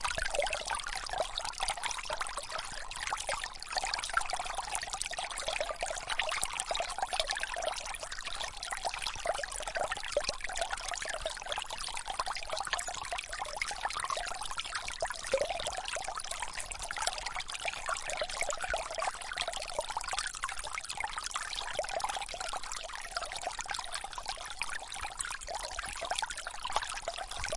Little river, recorded at various locations with a zoom h2
field-recording, nature, runnel